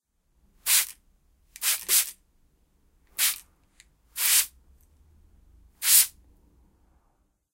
A plastic spray bottle being pumped and a mist coming out. Done a few times for slightly different sounds.